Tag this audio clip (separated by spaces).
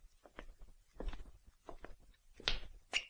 floor steps foley walk walking tiles shoes footsteps